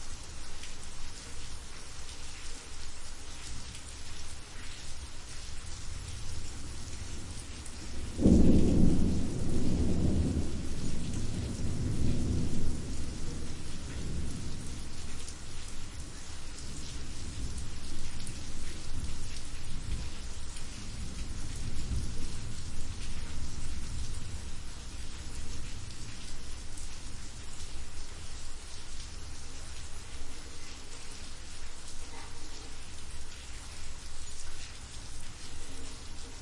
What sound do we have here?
rain thunder loop
Natural sounding recording of rain with single thunder. Perfectly looped. Recorder: Tascam DR100mk3, mics: DPA4060
thunder, rainstorm, rain, field-recording, ambient, thunder-storm, weather, lightning, storm, nature, thunderstorm, loop